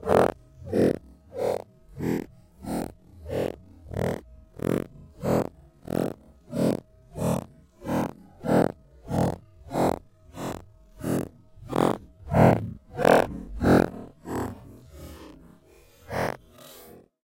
masking tape slow:pitch up
pulling a masking tape strip taut (slow/pitch up manipulated)
masking, pitch